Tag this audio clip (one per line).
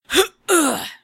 girl
voice